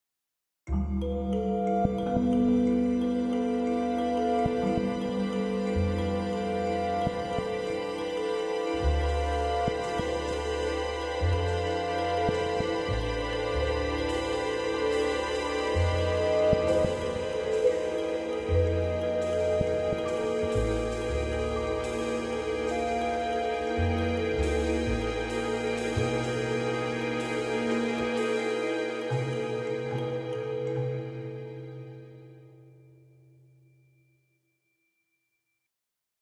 Peaceful Ambiance Theme 2
A calm but a bit mysterious background ambiance music.
Hifi, suitable for professional use.
violins, song, ambient